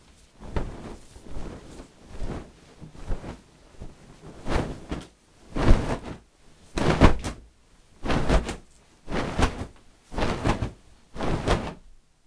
Fluffing A Blanket
Here you go, some free stuff to spice up your vids.
I did a couple of fluffs tbh
MIC: Samsung C01U pro.
pillow, blanket, fluffing, fabric, shake, bed, fluff